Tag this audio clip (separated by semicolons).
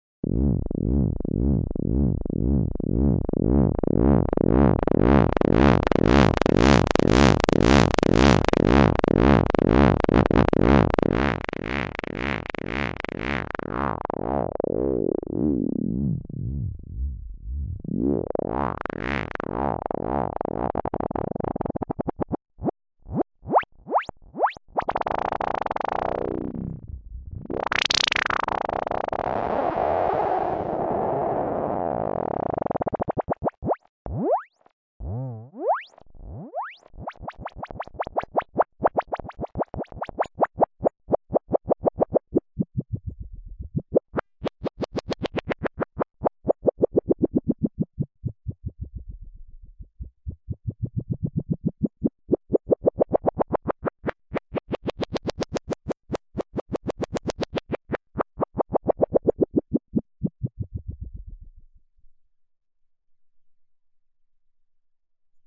glitch; sequence; sound-design; synthesis; synthi; weird